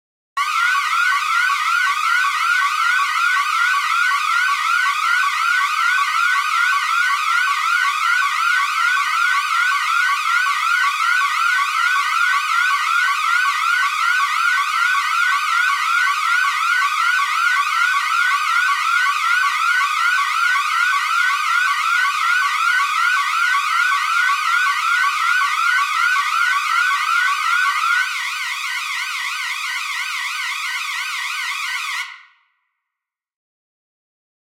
Alarm sound created with operator in ableton live